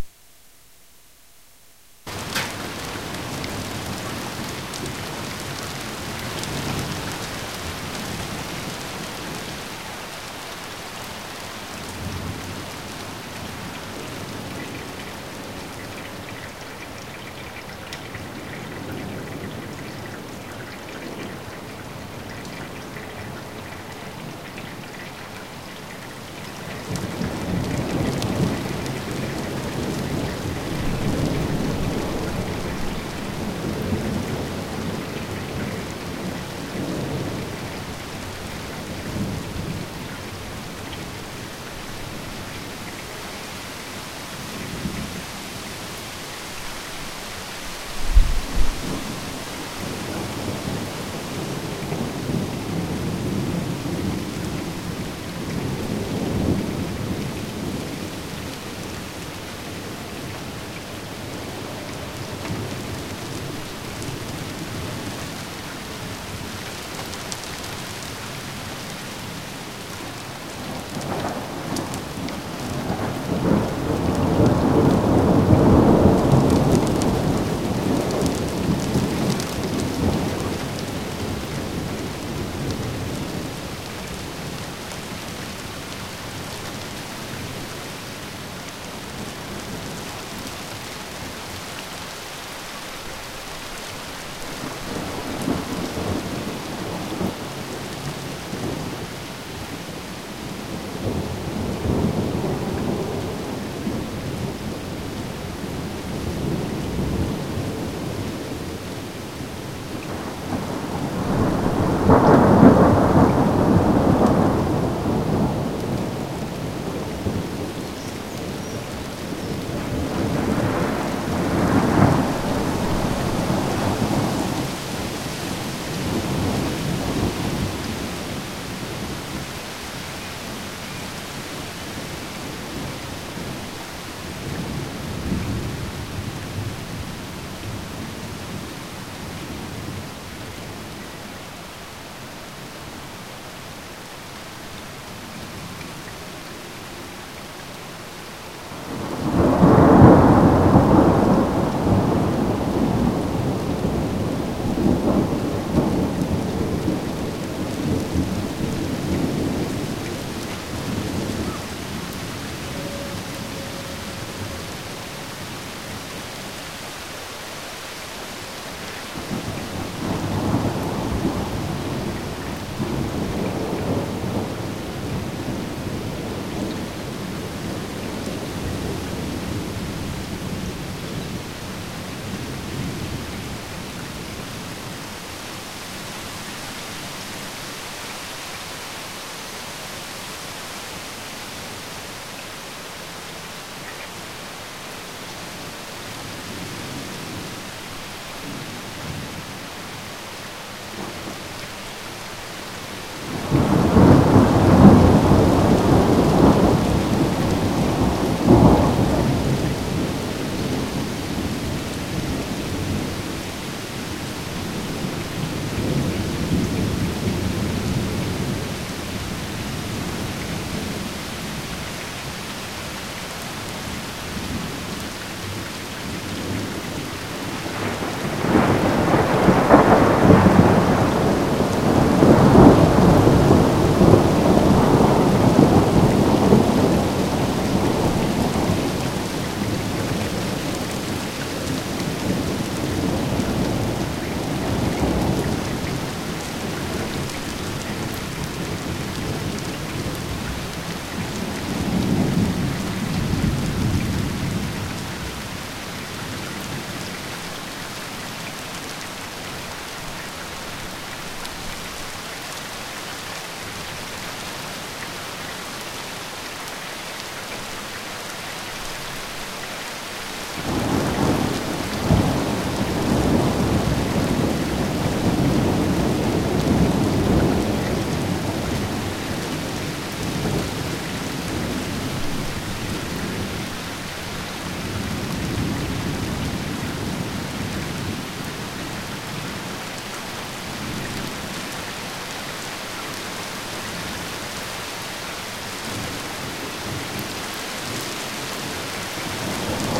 thunderstorm and rain
Cologne, Germany, June 1997, Dat.
ambient, nature, water